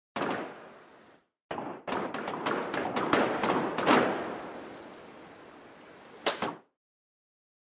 Chaotic clatter of percussive sounds spread out in the stereo field.